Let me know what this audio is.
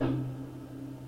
A hit on a bass drum with reverb
bass, drum